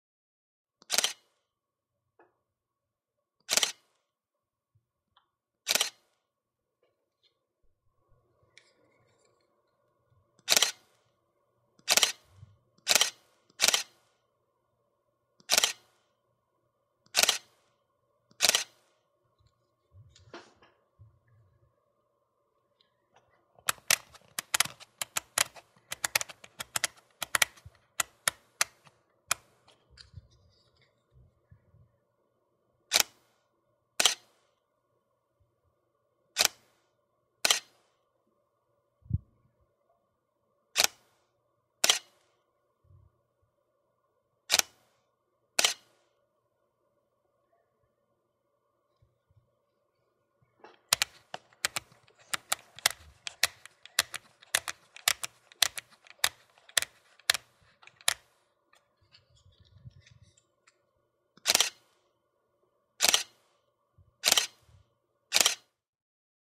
DSLR Shutter Dials
DSLR long/short exposure shutter sound and using the dial. Canon 600D
dslr, handling, rattle, rattling, setting, setup, shutter, up